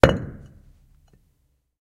stone falls / beaten on stone